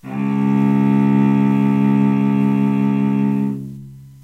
2 cello C#2 Db2
A real cello playing the note, C#2 or Db2 (2nd octave on a keyboard). Second note in a chromatic C scale. All notes in the scale are available in this pack. Notes, played by a real cello, can be used in editing software to make your own music.
C, note, D-flat, stringed-instrument, Db, scale, C-sharp, violoncello, string, cello